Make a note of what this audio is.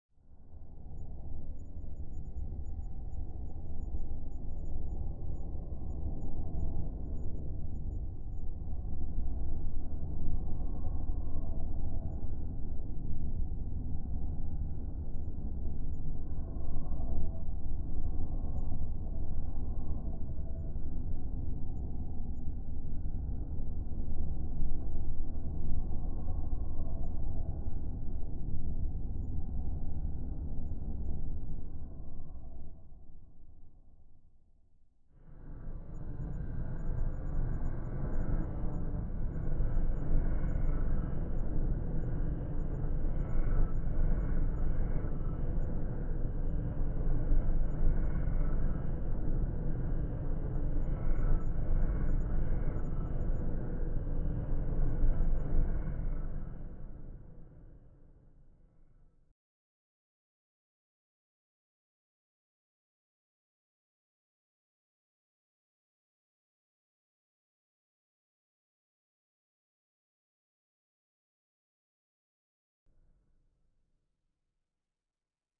basement low sustained
ambient, artificial, deep, drone, low, pad, soundscape, sustained